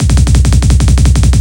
A simple drumroll, meant to be used with the other Psyrolls in my "Misc Beat Pack" in order like this: 1,2,3,4,5,6,7, etc so it speeds up:)
Club, Drumroll